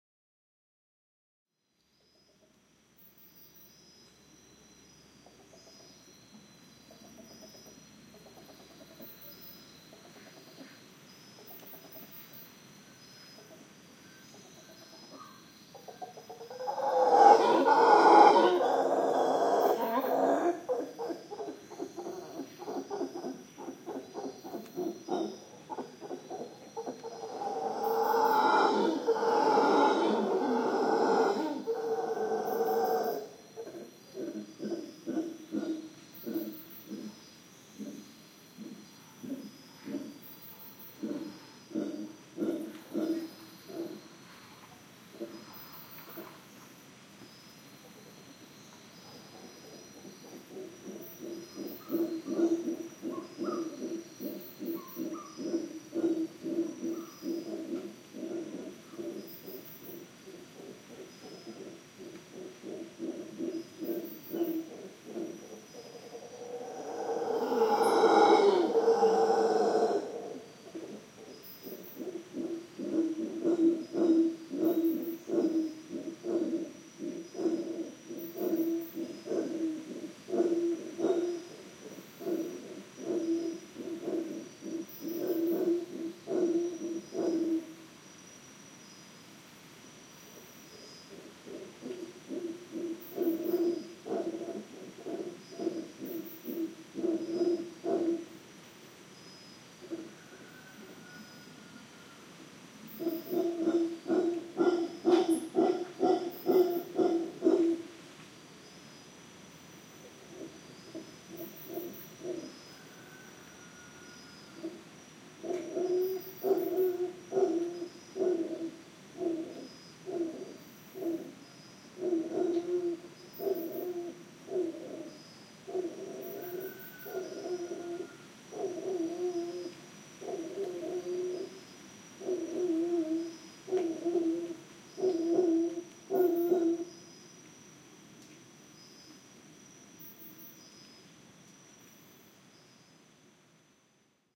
A howler monkey in the forest in Casitas Tenorio, Costa Rica, by the morning.